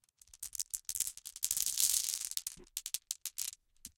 Glass marbles being poured back and forth from one hand to another. Grainy, glassy sound. Close miked with Rode NT-5s in X-Y configuration. Trimmed, DC removed, and normalized to -6 dB.